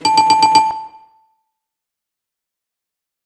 Winner Bell Game Show
Game Show Bell. You're a winner!
bell, game-show, winner, winner-bell